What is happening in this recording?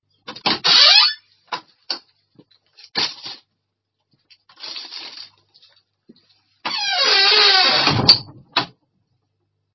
Squeaky Shed Door
Shed door opens, then closed. First with a metallic click to unlock and followed by a long opening creaking door. Once opened there is a plastic rustle and the door closes with an even longer spooky creaking noise. Followed by a clunk when shut and a metallic click to lock the shed door. Silence back and front.
Close, Creak, Creaking, Creaky, Door, Open, Shed, spooky, Squeak, Squeaky, Wooden